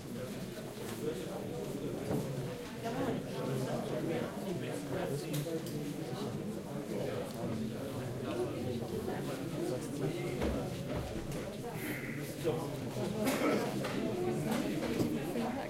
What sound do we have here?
Busy Room Ambience / Small crowd / People talking in background
People talking in a room. Recorded with a Zoom H2n.
murmur, chat, muttering, chatter, voices, background, crowd, ambient, mumble, ambience, field-recording, busy, room, people, talking, crowded, walla, undertone, chatting, noise